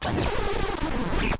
winder-grind-1
This sound was ripped from a recording session using a circuit bent toy laser gun.
1/2 circuit bent winder grind sounds from my Circuit Bent Sound Pack II.